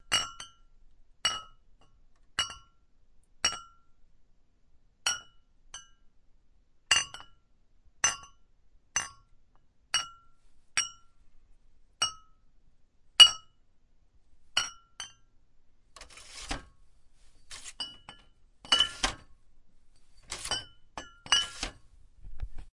Glass bottles colliding and being put in a bottle-holder.